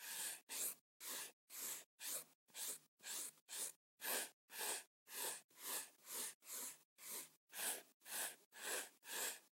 marker medium streep
drawing, marker, pen, pencil